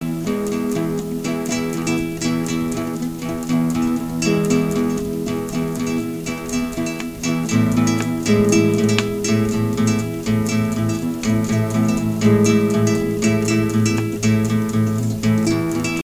YHF Guitar
A collection of samples/loops intended for personal and commercial music production. For use
All compositions where written and performed by
Chris S. Bacon on Home Sick Recordings. Take things, shake things, make things.
acapella, acoustic-guitar, bass, beat, drum-beat, drums, Folk, free, guitar, harmony, indie, Indie-folk, loop, looping, loops, melody, original-music, percussion, piano, rock, samples, sounds, synth, vocal-loops, voice, whistle